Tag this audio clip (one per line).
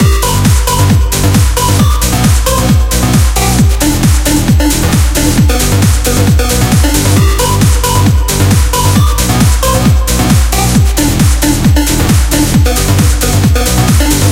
palumbo
videogame
mario
happyhardcore
8bit
trance
tim
music
sega
synth1
v-station